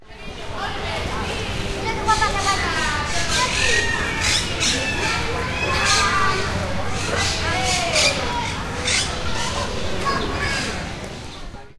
Cotorras, pavo, human voices
Call of Peafowl (Pavo real, scientific name: Pavo cristatus), Monk Parakeet (Cotorra, Myiopsitta monachus), human voices and ambient sounds of the zoo.
ZooSonor, animals, Barcelona, voice, Birds, zoo, field-recording, Spain